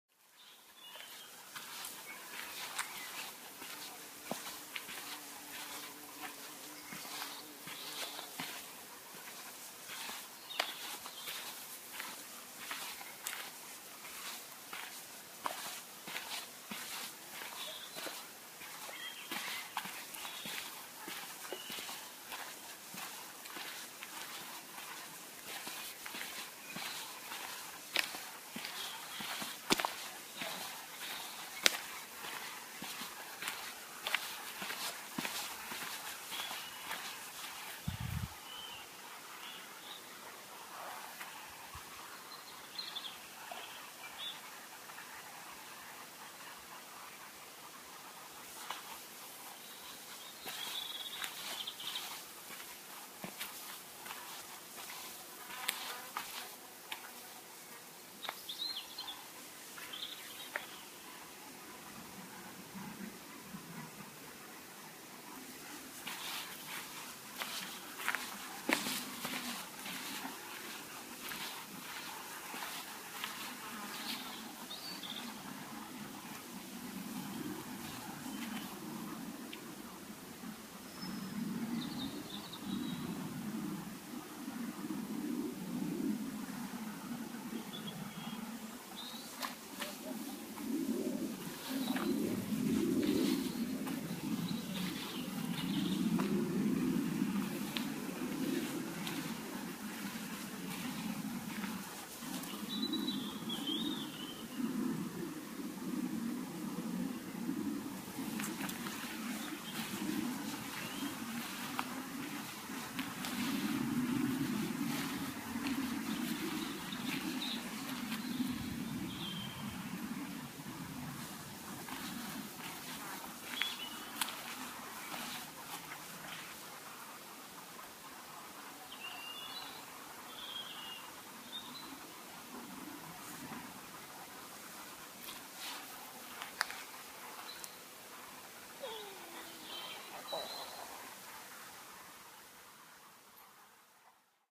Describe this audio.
Forest Wanderings
Forest ambience and a few footsteps recorded with a 5th-gen iPod touch.
ambiance, ambience, ambient, birds, field-recording, footsteps, forest, nature, purist, tree, trees, wind